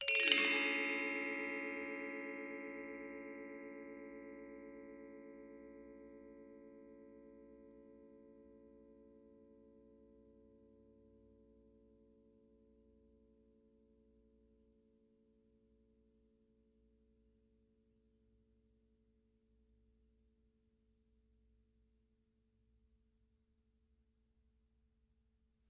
Bwana Kumala Ugal 00
University of North Texas Gamelan Bwana Kumala Ugal recording 0. Recorded in 2006.
percussion, bali